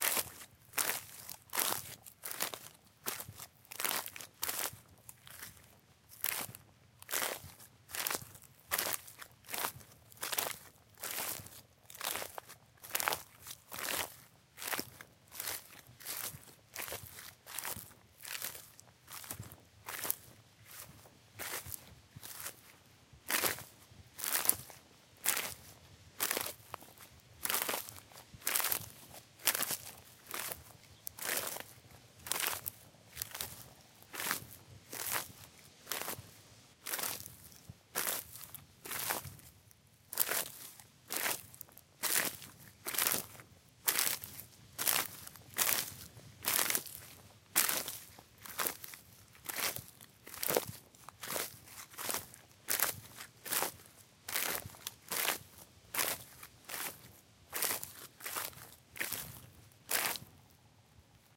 Footsteps on the cut wheat